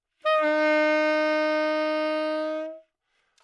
Sax Tenor - D#4 - bad-attack bad-richness bad-timbre
Part of the Good-sounds dataset of monophonic instrumental sounds.
instrument::sax_tenor
note::D#
octave::4
midi note::51
good-sounds-id::5215
Intentionally played as an example of bad-attack bad-richness bad-timbre
Dsharp4, sax, good-sounds, single-note, tenor, neumann-U87, multisample